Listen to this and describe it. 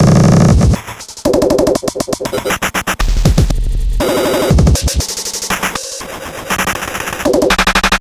Bend a drumsample of mine!
This is one of my glitch sounds! please tell me what you'll use it for :D
android, art, artificial, bit, command, computer, console, cyborg, databending, droid, drum, error, experiment, failure, game, Glitch, machine, rgb, robot, robotic, space, spaceship, system, virus